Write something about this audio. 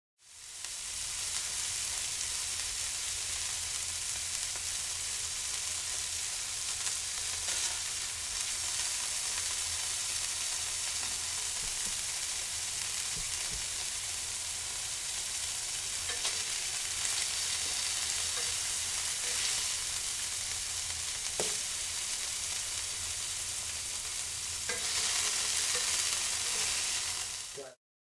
MEAT SIZZLE
A mono recording of beef patties cooking on a hot grill.
Audio Technica AT835B
meat, hot, cook, sizzle, field-recording, grill